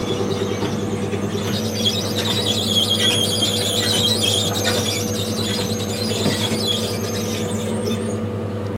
THAT vending machine spinning is compartments round.
squeaky, machine, vending, vending-machine, spin, annoying